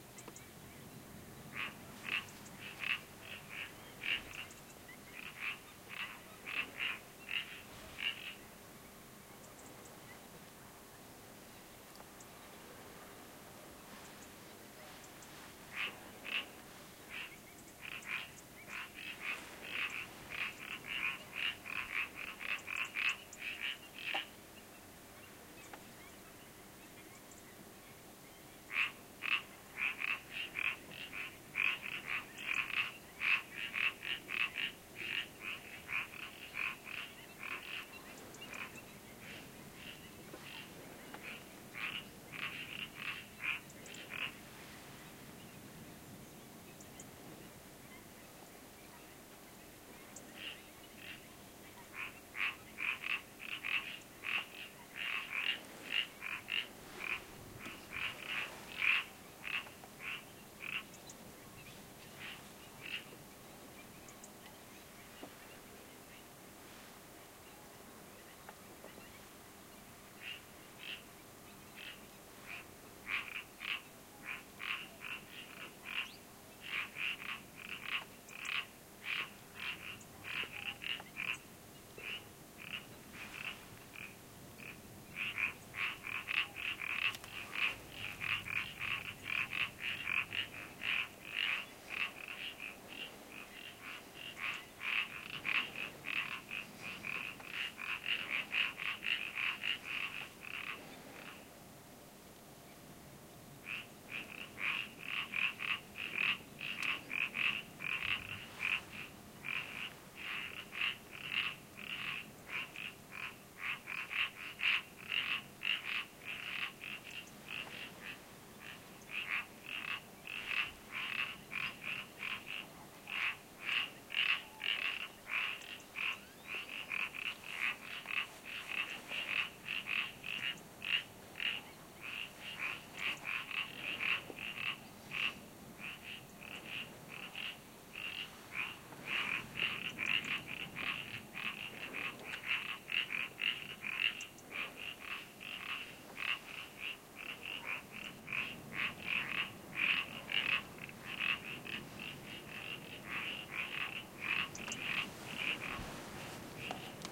frogs calling near Centro de Visitantes Jose Antonio Valverde, S Spain
ambiance, autumn, frogs, birds, nature, field-recording